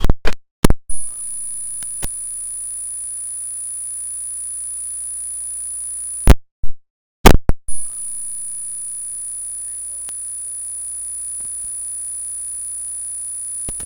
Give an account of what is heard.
Mic unplug interference
Accidental unplug of mic during recording (i think - don't really remember the situation).
abstract, cool, digital, electric, electronic, freaky, glitch, Interference, mic, microphone, noise, sci-fi, soundeffect, spooky